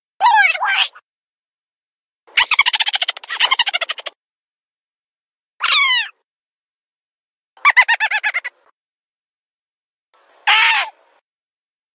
Funny Cute Sounds 2
Here's another set of Funny Cute Sounds. Like the first audio file, I sped the videos I made in Windows Movie Maker and recorded the bits in my recorder that I found cute or funny.
voice, chipmunk, sped, cute, funny, up, sound, cartoon